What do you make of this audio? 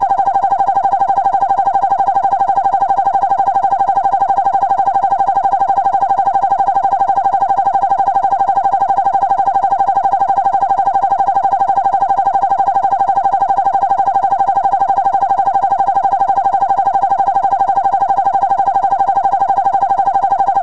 Flying Car - Hover
AUDACITY
For left channel:
- Cut silence before (0.000s to 0.046s), middle (0.096 to 0.228), and after (0.301 to 0.449) sound
- Cut middle part 0.130 to 0.600
- Effect→Change Speed
Speed Multiplier: 0.800
Percent Change: –20.000
- Effect→Repeat...
Number of repeats add: 250
- Effect→Equalization
(18 dB; 20 Hz)
(18 dB; 800 Hz)
(–18 dB; 2000 Hz
(–26 dB; 11 000 Hz)
For right channel:
- Tracks→Add New→Mono Track
- Copy left track and paste at 0.010 s in right track
jetsons, xe-bay